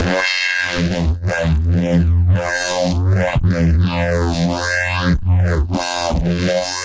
Reese Bass
Drop, Dubstep, Bass, Reese, Growl